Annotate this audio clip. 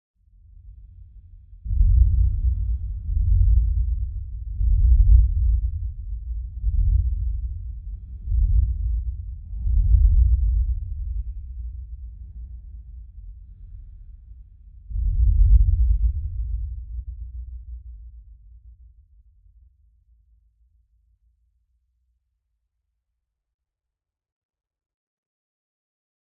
Distant Bombing
The war is coming, and you hear the destruction that is coming to you.
Enjoy
bomb, bombing, distant, fire-crackers, fx, missle, mortar, rockets, sound-effect, war